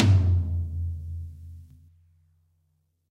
Middle Tom Of God Wet 012
middle, kit, tom, set, pack, drum, realistic, drumset